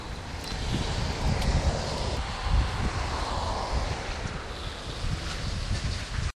southcarolina exit102santee gassnippet
A snippet of sound from from a gas station next to Santee Resort Inn recorded with DS-40 and edited in Wavosaur.
field-recording, gast-station, road-trip, south-carolina, summer, travel, vacation